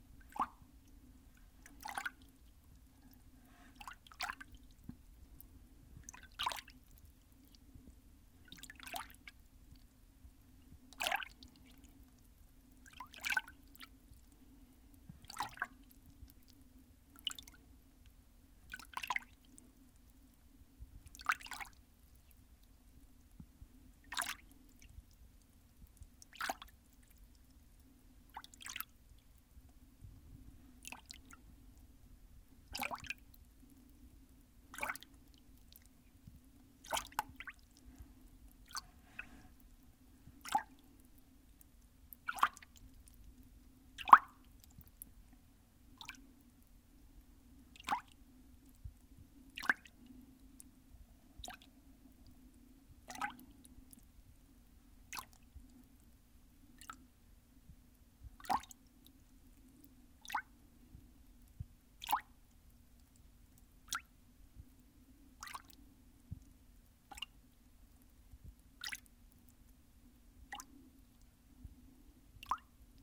Water splashing. Recorded in a 5 gallon bucket, hand splashing water against sides of bucket.